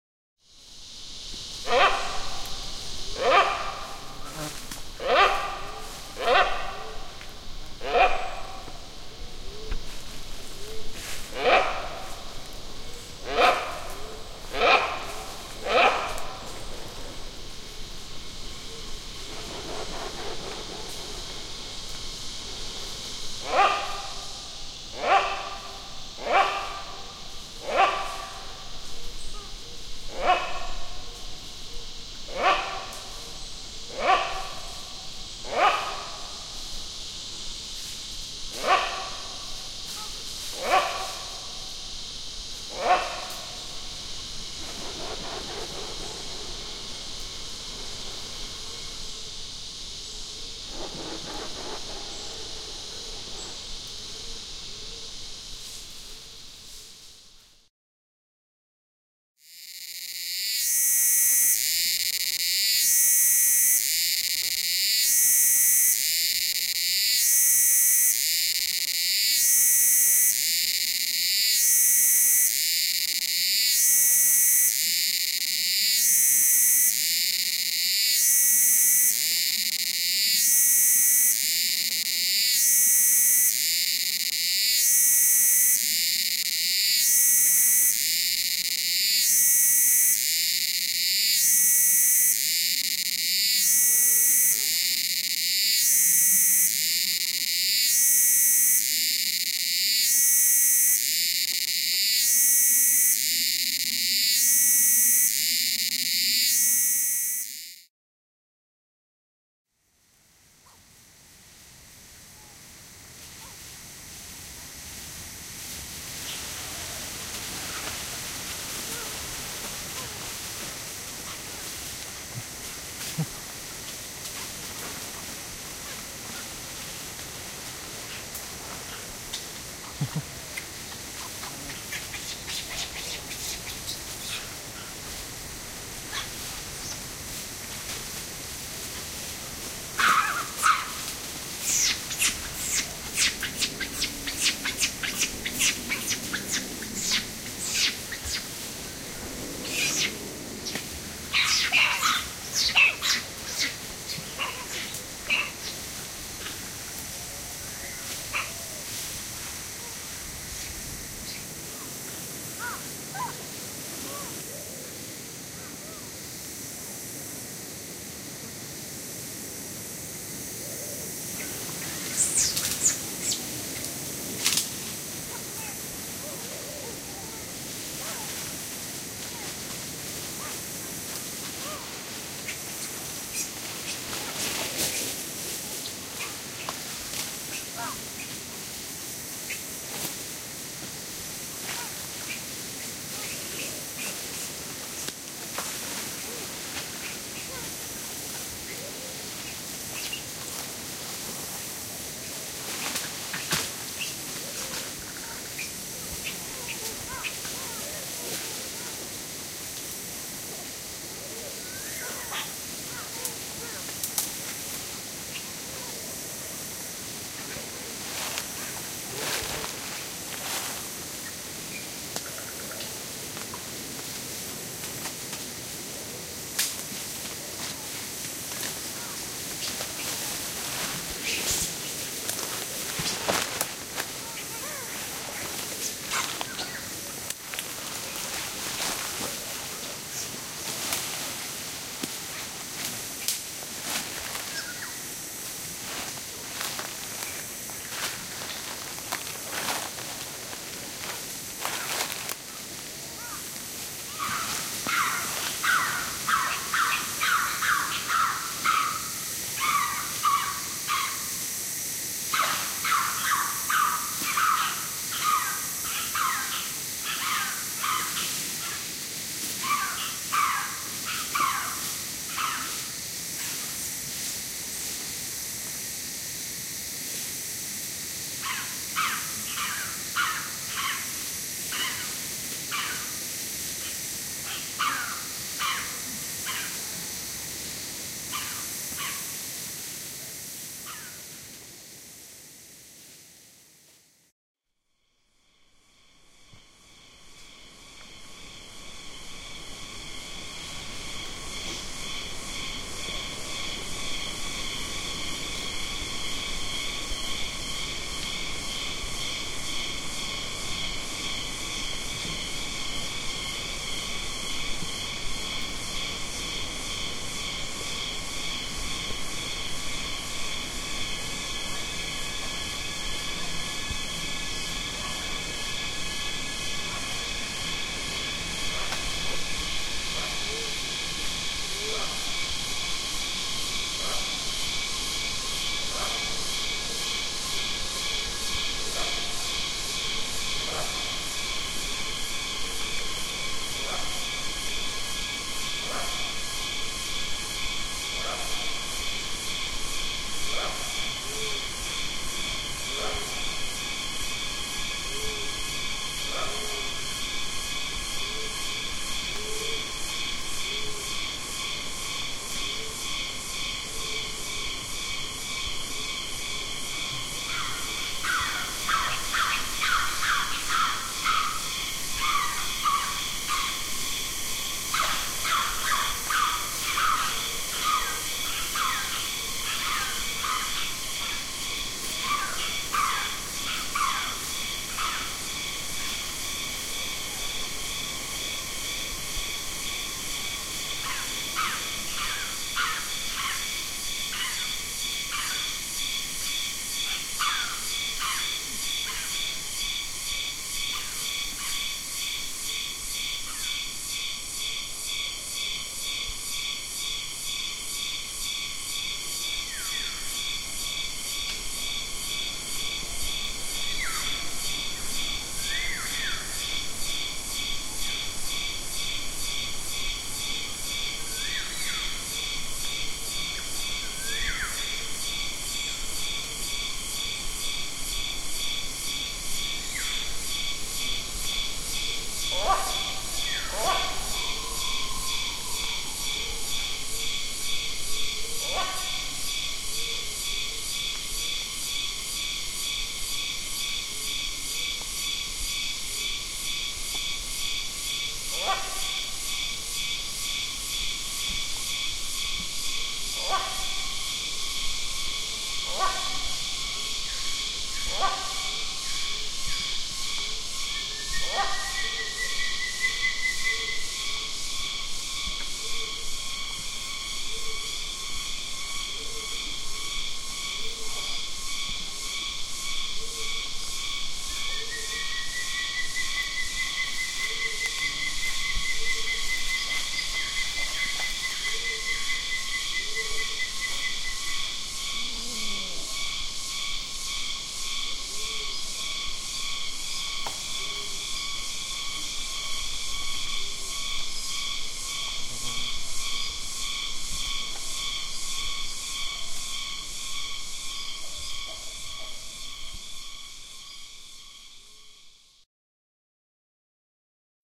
Tangkoko Nature Reserve - Sulawesi, Indonesia
A selection of field-recordings made in Tangkoko Nature Reserve, Sulawesi (Celebes), Indonesia. The sounds in order include;
1. Several Knobbed Hornbills bird-calling and flying through the rainforest
2. Close-up recording of a lone cicada
3. Troop of Celebes Black "Ape" Macaques foraging on the jungle floor
4. Insect chorus at dusk
Knobbed, nature-sounds, Hornbill, tropical, bird-call, jungle, forest, Sulawesi, rainforest, ape, Celebes, national-park, black, nature, primate